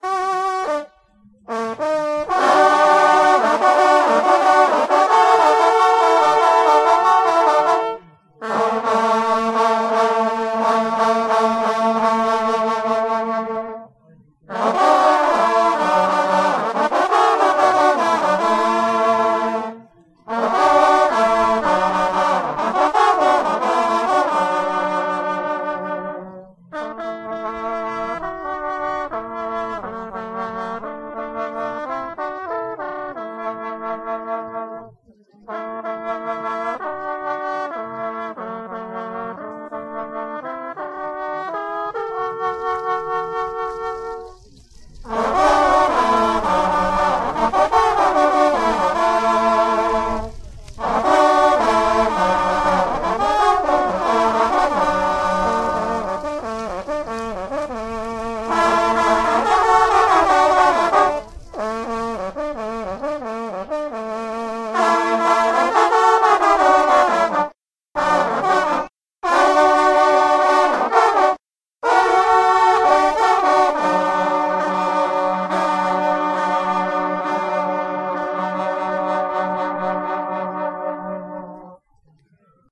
Hunting horn players recorded at a dog and hunting festival in La Chatre (France)
berry, france, horn, hunting, tradition